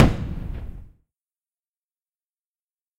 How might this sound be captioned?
Explosive 1 v3 [DOD 130303]
tnt, army, military, damage, gun, explosive, explosion, attacking, artillery, weapon, counter-strike, guns, destruction, bang, shot, tank, destructive, kaboom